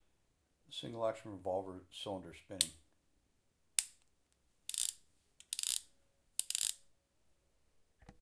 Single action revolver cylinder spinning (great for Russian Roulette or an Old West scene)
action, cowboy, roulette, spin, spinning, west